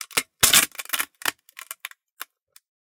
This crunch is egg-shell, but there is a long piece of hard ABS plastic being used, and it resonates in an odd way. You can't really hear the crackle of the egg-shell at times because it is drowned out by the resonances of the plastic. So this one is rather unusual among the sounds in this pack, and generally less useful. But you never know. Slow it down just a little bit, and the one louder burst kind of sounds like a very noisy camera shutter or film-advance motor, or something like that. As an example of interesting things that you might do with it, check out "SmashingResonanceRe-rated_MechaniCycle". See the pack description for general background.
bug, crack, crackle, crunch, crush, eggshell, egg-shells, grit, quash, smash, smush, squash, squish, wood